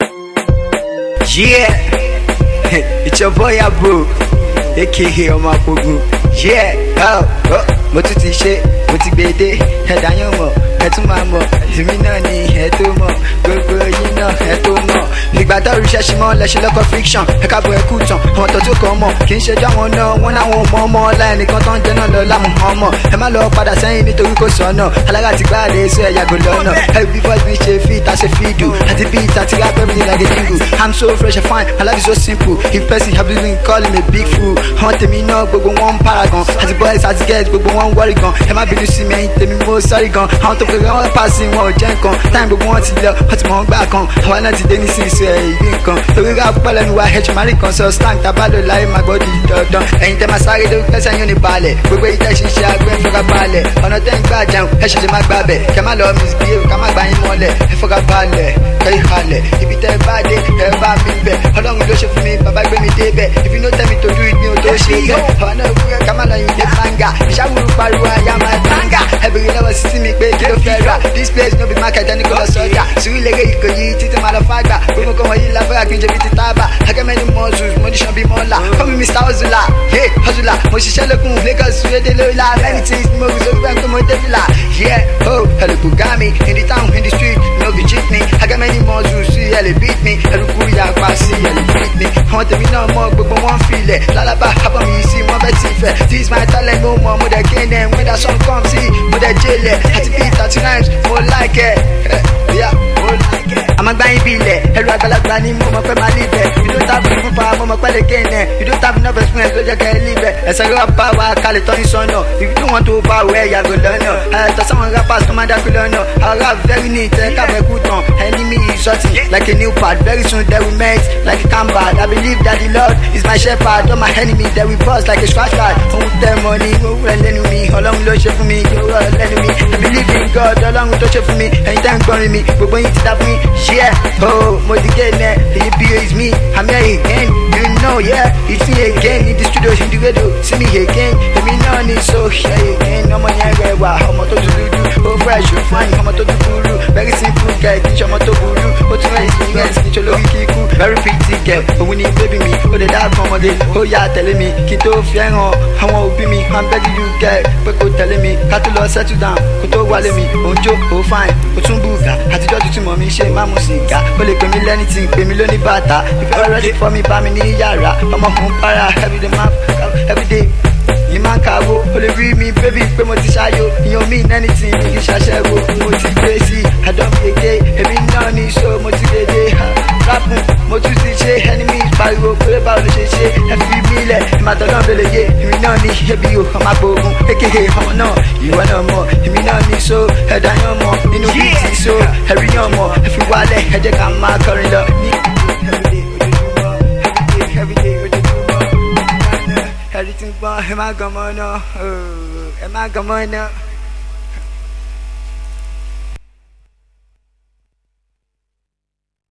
ABOO NAANI
yaah, field-recording, a, popular, tag